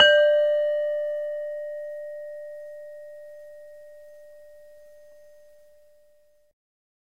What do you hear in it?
Sample of a saron key from an iron gamelan. Basic mic, some compression. The note is pelog 1, approximately a 'D'